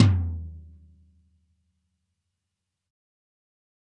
Dirty Tony's Tom 14'' 055
This is the Dirty Tony's Tom 14''. He recorded it at Johnny's studio, the only studio with a hole in the wall! It has been recorded with four mics, and this is the mix of all!
tom, realistic, 14, raw, drum, metal, pack, 14x10, real, drumset, punk, heavy